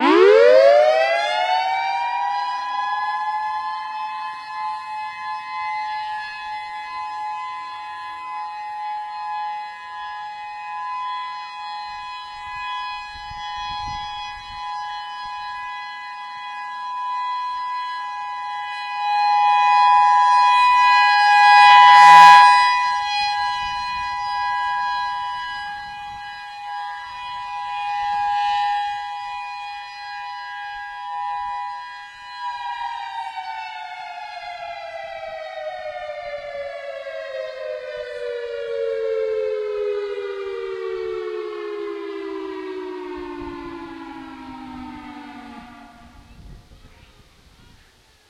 8-1-08 EOWS 612 test
Friday, August 1st, 2008. 11:45am. Civil Defense monthly test of a Federal Signal EOWS 612(Emergency Outdoor Warning Siren, 6 drivers, 1200 watts, Rotating array). This one is in the community park/elementary school.
This siren is rated at about 120-125dB @ 100ft. I was at about 80ft away from it. Used an Edirol R-09(AGC off, LOW CUT on, MIC GAIN low, INPUT LEVEL 30) + Sound Professionals SP-TFB-2 Binaurals. Sound kind of clipped when the siren pointed at me at full blast. I did however find the optimal settings to record my next siren on September 1, 2008.
Sorry for the wind noise: even with windscreens, it got to the mics. I'll be looking into some more effective ways of cutting out wind.
civil, signal, tsunami, eows, binaural, defense, raid, siren, honolulu, hurricane, 612, air, hawaii, federal